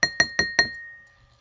This sound is part of my windows sounds pack. Most sounds are metaphors for the events on the screen, for example a new mail is announced by the sound of pulling a letter out of an envelope. All sounds recorded with my laptop mic.
bell, metaphor, recorded, vista, windows, xp